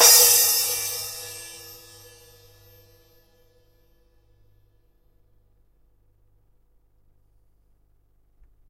This cymbal was recorded in an old session I found from my time at University. I believe the microphone was a AKG 414. Recorded in a studio environment.